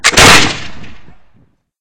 Muskets like this used during the revolutionary war and civil war.
gunfire, musket, treasure-island